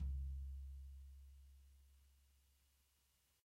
Dirty Tony's Tom 16'' 003
This is the Dirty Tony's Tom 16''. He recorded it at Johnny's studio, the only studio with a hole in the wall! It has been recorded with four mics, and this is the mix of all!
16, dirty, drum, drumset, kit, pack, punk, raw, real, realistic, set, tom, tonys